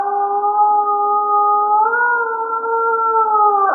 mystical singing 1
scifi, mystical, siren, singing, processed, fantasy